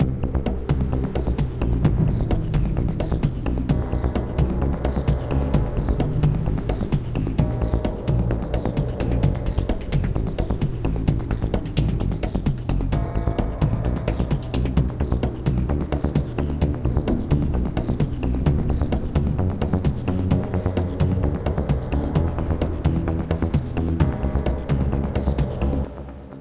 An extension to a previous track I uploaded. The battle has begun but the war isn't over yet. You can hear some congas (African drums) in the background as well.
Made using FL Studio and mostly using reFX Nexus-plugin